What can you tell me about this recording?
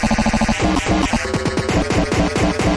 -GRAIN SLAPS
distortion, heavy, slappy, glitch-hop